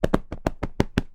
A series of fast punches on a pillow. It also sounds like chest punches on a person with a jacket or similar clothing